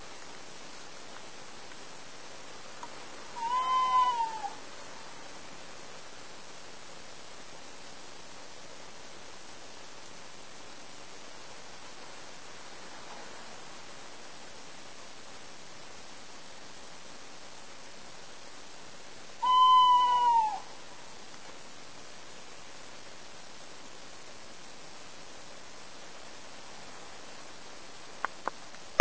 Two short hoots from a tawny owl. It was sitting in a tree right outside my window, by the time I thought of recording it it flew off!
field-recording, hoot, owl, bird-song, tawny, birdsong